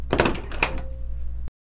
locking door sound